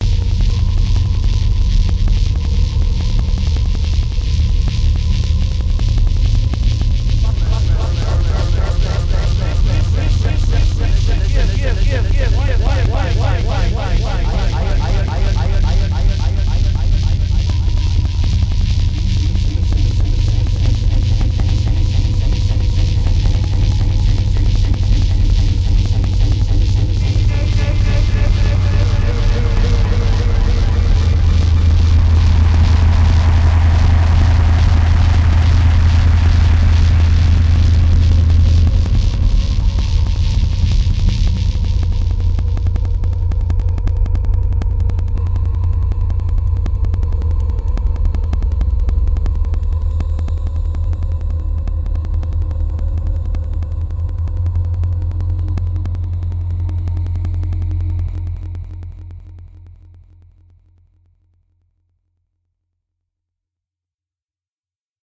Structual Trp Noise
Buzzing, Industrial, Machinery, Noise, People, Sirens, Trpm